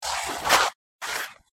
Taken bits and pieces from 189230__starvolt__shuffling-3-front and it sounded oddly like shoveling somehow...